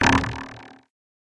boom vocoded
Vocoded boom made by unknown recordings/filterings/generatings in Audacity. From a few years ago.